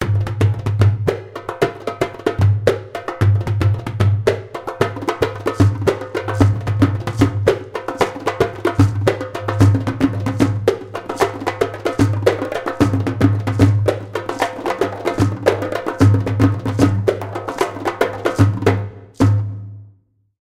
diffrent type of Percussion instrument of darbouka :
ayyoub/darij/fellahi/malfuf/masmudi-kibir/masmudi-sagir/rumba-.../Churchuna/Dabkkah/Daza/
dancer, arabic, eastern, insterment